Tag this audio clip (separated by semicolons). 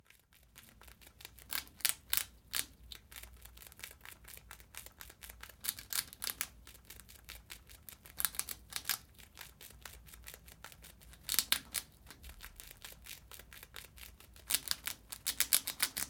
animal; gnawing; pet; rat; ruck